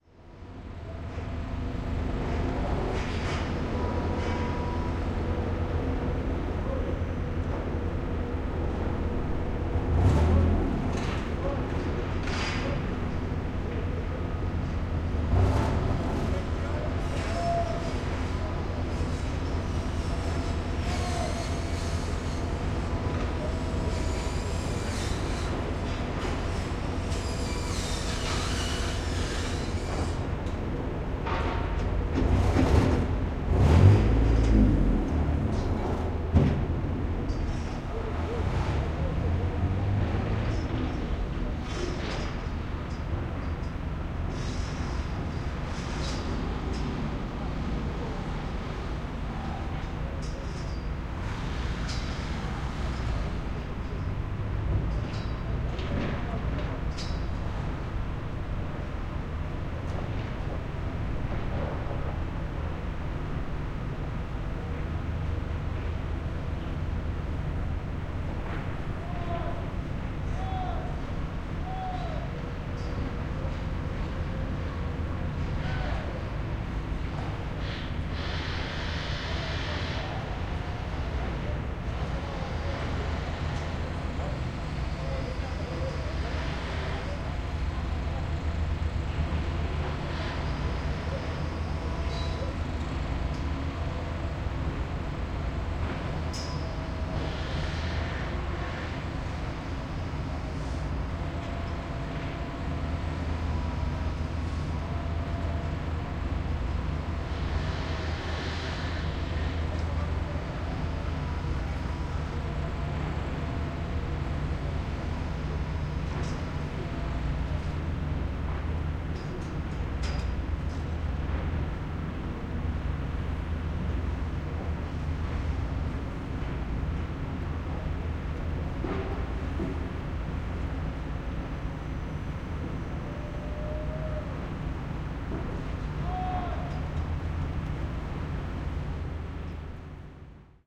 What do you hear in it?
Construction Site Sound - Take 3
atmospheric, loud, general-noise, atmosphere, background, ambience, atmos, construction, rumble, white-noise, ambient, soundscape, site, atmo, background-sound, ambiance